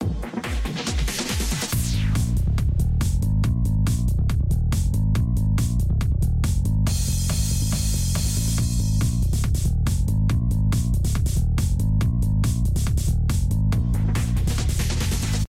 A small composed loop rendered with fruityloops. Originally a sound created for a victory/feelgood sound, but never used so. The end has got the start again in order to further expand a song on it.
Bass, Drive, Groovy, Win, Dance, Race, Beat, Fight, Scene, Driving